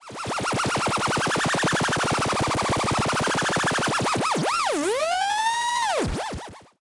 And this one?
remind me the jetson spaceship, made with Blackbox from arcDev Noise Industries